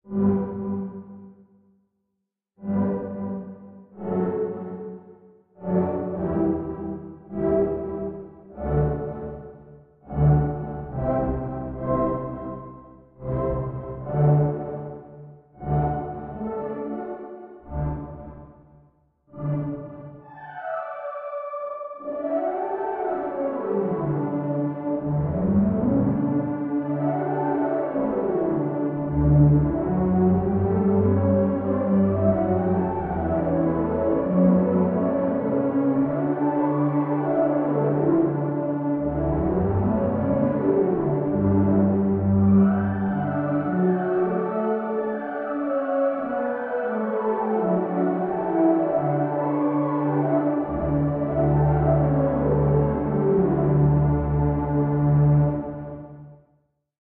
A haunted organ played in a haunted place by a ghost in a haunted key of D major. The key is haunted because each note has a little bit of detuning so it might not be well suited for every D major western-music composition.
Organ created and processed in the Venom Audio Synthesizer. Recorded in Sony Sound Forge 10.